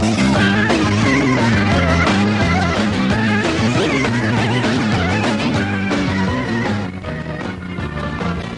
TBB = Tape Behaving Baddly
I had to look hard for this, some of my oldest and shitiest tapes.
Hard to know what this is (was) the tape plays so bad that it is unrecognizeable. This was always a shitty cheap tape and ageing about 25 years in a drawer has not done it any favours.
Recording system: Not known
Medium: Toshiba C-90T, about 25 years old
Playing back system: LG LX-U561
digital recording: direct input from the Hi-Fi stereo headphone socket into the mic socket on the laptop soundcard. Using Audacity as the sample recorder / editor.
Processing: Samples were only trimmed
bad, lo-fi, tape, cassette, wow, poor, collab-2, old, broken, poor-quality